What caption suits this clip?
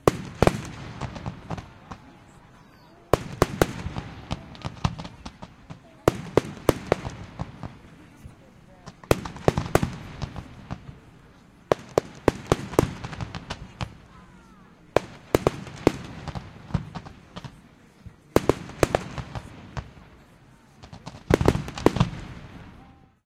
fireworks impact14
Various explosion sounds recorded during a bastille day pyrotechnic show in Britanny. Blasts, sparkles and crowd reactions. Recorded with an h2n in M/S stereo mode.
blasts, bombs, crowd, display-pyrotechnics, explosions, explosives, field-recording, fireworks, pyrotechnics, show